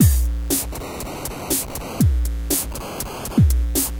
120 Distorted Beat
Basic 2 bar distorted drum loop. 120 BPM.